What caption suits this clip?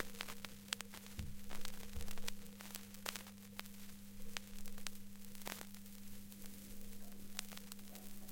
vinyl loop 2
Real vinyl noise recorded from and old vinyl from the 70s
Turntable -> sound mixer -> Zoom H4n
cracking, crujiente, crunchy, noise, vinilo, vinyl